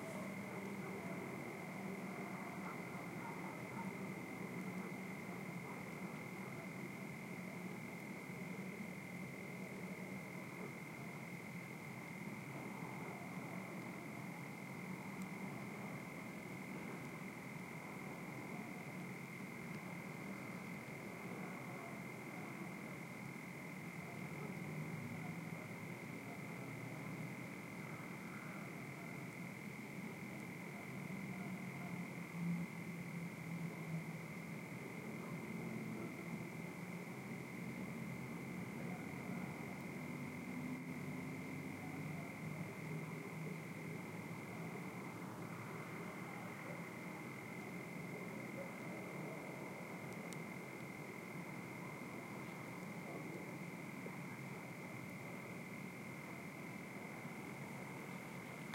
cricket chirping very far, and barking dogs. Recorded near Tavira, Portugal, with two Shure WL183 capsules into FEL preamp, Edirol R09 recorder